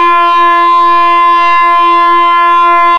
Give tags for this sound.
experimental; image2wav; synthetic; woodband

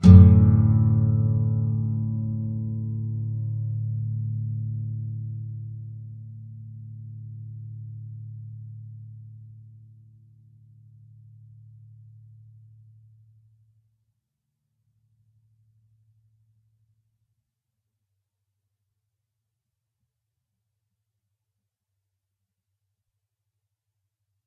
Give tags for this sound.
acoustic; clean; guitar; nylon-guitar

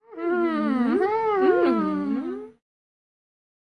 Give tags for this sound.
final; voces

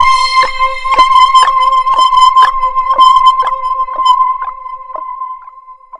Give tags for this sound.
distorted; lead; multisample; pulsating

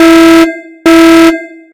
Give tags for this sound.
Alarm; Attack; Fire; War

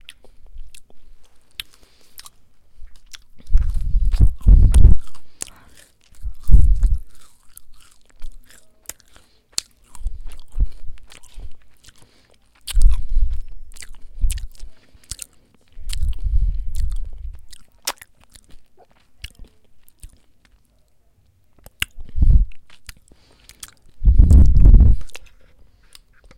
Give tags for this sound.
gross; salivating; chewing

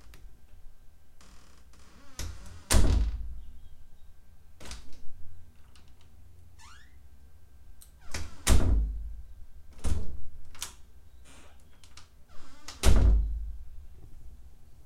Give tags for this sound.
House; Door; Slam

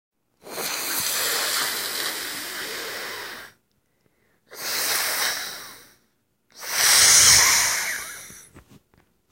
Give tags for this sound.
field-recording; best; monster

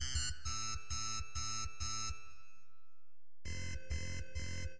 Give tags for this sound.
100; Melody

